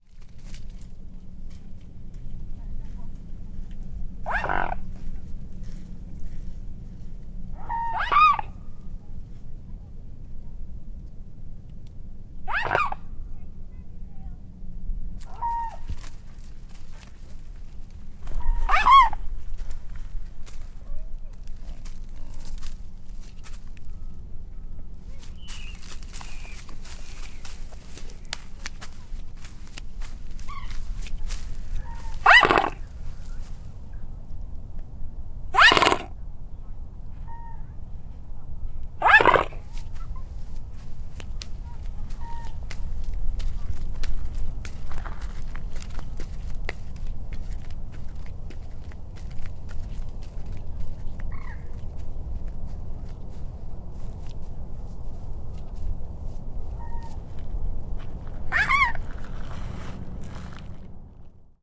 Swan Signet Honk and Rustle
The swans were fairly active again at the Newport Wetlands. Unfortunately the air traffic was ridiculous. Nice to get up close to a swan making noises though.
animal, birds, field-recording, locationrecording, nature, swan